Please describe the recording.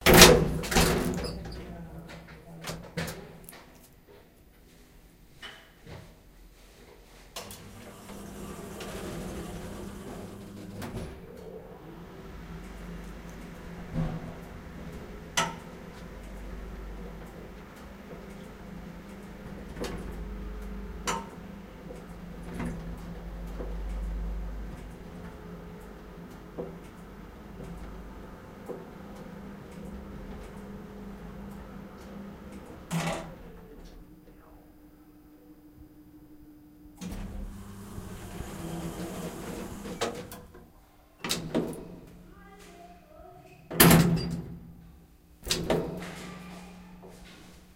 H4 - Puertas ascensor y sondo de marcha y parada
elevator lifter start stop elevador ascensor
elevador, start, lifter, stop, ascensor, elevator